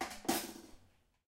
spoon down 10
kitchen drum percussion jar tap sound hit
drum, hit, jar, kitchen, percussion, sound, tap